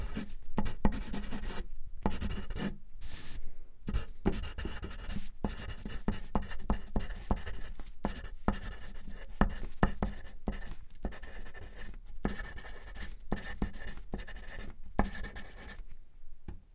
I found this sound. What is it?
Recorded on an SD 702 with an SM81 and a cheap akg SDC can't remember which one just wanted variety. Not intended as a stereo recording just 2 mic options.
No EQ not low end roll off so it has a rich low end that you can tame to taste.